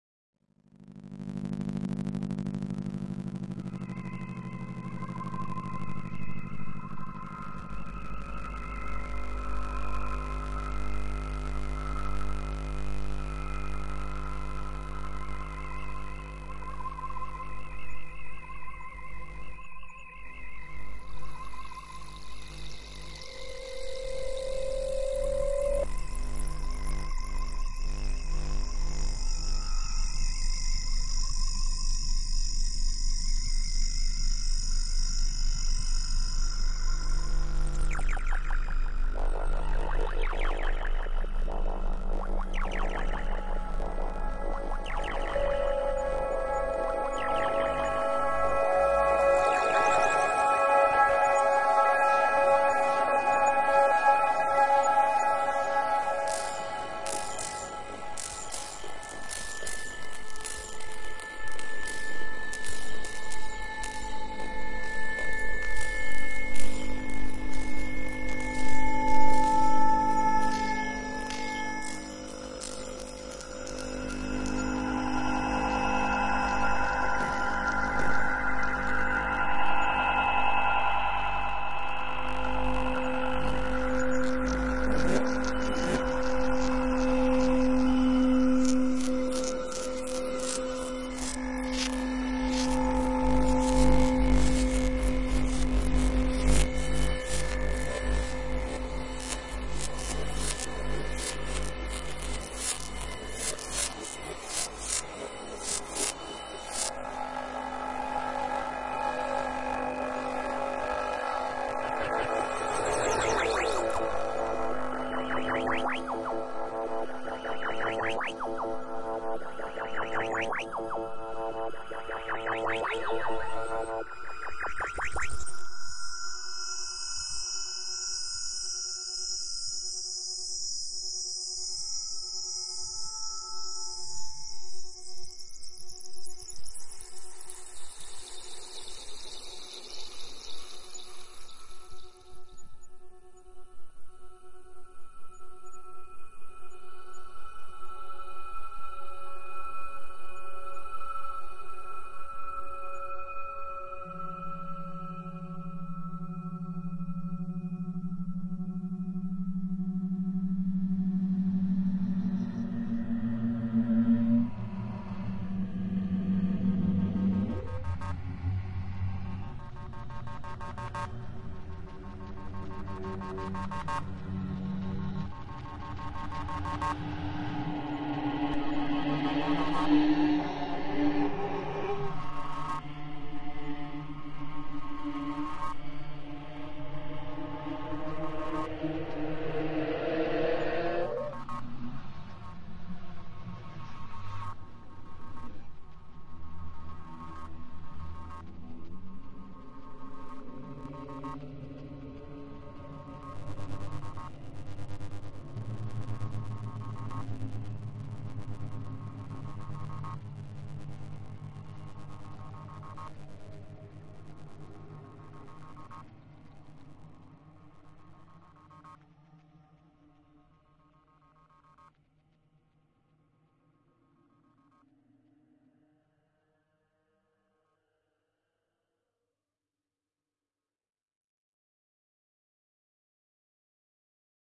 Spinning through cricket`s and space atmosphere...as Ripping my clothing.